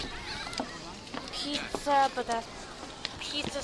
newjersey OC bwpizzaloop
Loopable snippets of boardwalk and various other Ocean City noises.
loop, ocean-city, field-recording